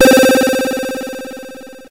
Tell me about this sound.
A SID arpeggiated lead. Sounds like the 0CC effect in most tracker software. Programmed using GoatTracker, rendered using SIDPLAY2.
thanks for listening to this sound, number 201646
arpeggio, c-64, c64, chip, chiptune, demo, keygen, lead, sid, square, synth, vgm, video-game